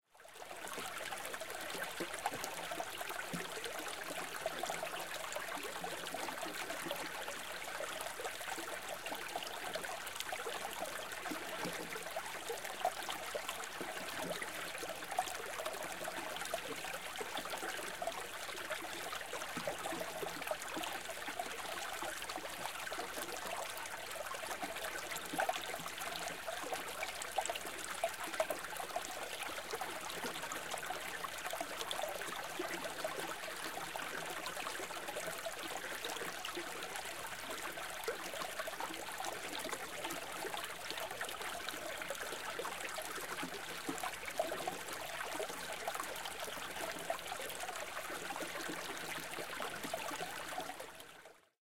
stream finland2
A small stream in the woods of Finland.
Quite bubbly and vivid.
field-recording, ambient, stream, nature, river, water